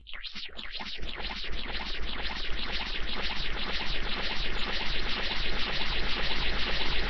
Results obtained by shaking a bottle of water. Adding echo, phased, and repetition.